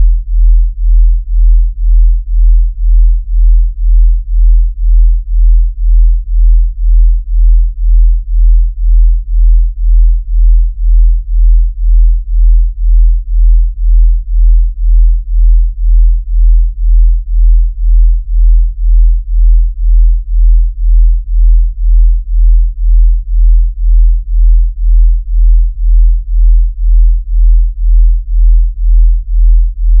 This Delta Binaural beats is exactly 2Hz apart and loop perfectly at 30s. Set at the low base frequency of 40Hz and 42Hz, it's a relaxing hum.
beats, binaural, delta, low, relax, sine, sleep, synthesis, tone